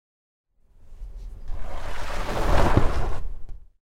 from a set of hand rattled and torn paper samples